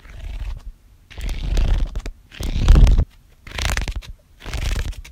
Music 152, Jailene R, flipping through book
152, Book, Music, sounds